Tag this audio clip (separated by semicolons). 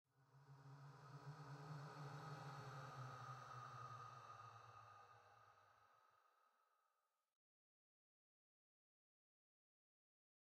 dark; yottasounds; ambiance; filmscore; breath; ambient; atmosphere